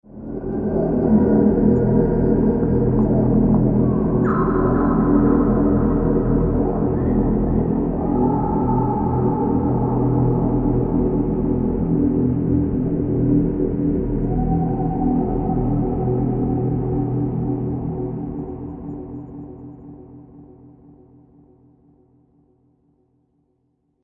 ambience on Swamp planet Zurgle